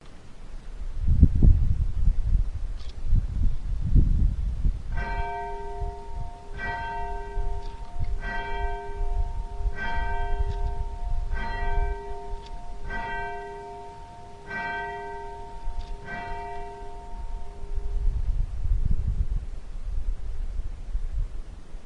Recording of the bells at Chillingham Castle in Northumberland in 2006. Recorded on a Sony Mini-disc.